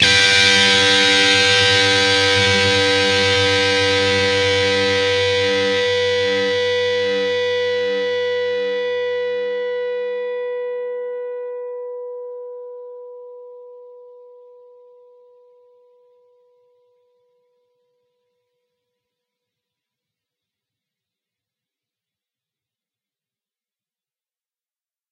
Dist Chr B-G 2strs 12th up
Fretted 12th fret on the B (2nd) string and the 15th fret on the E (1st) string. Up strum.
lead-guitar, lead, distortion, distorted-guitar, distorted, guitar, guitar-chords